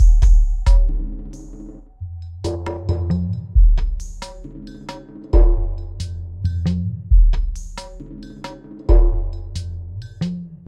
Ambient Groove 004
Produced for ambient music and world beats. Perfect for a foundation beat.